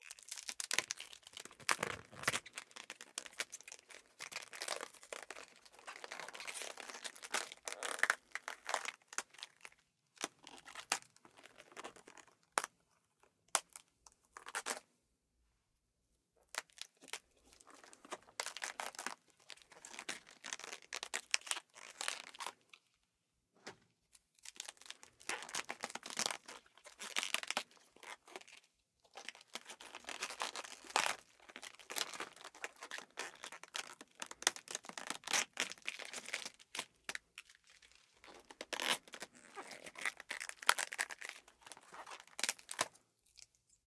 FLESH TEARING
Tearing up a cabbage. It sounds really nasty. Use as a gross sound effect!
beast, bones, cracking, eating, flesh, monster, zombie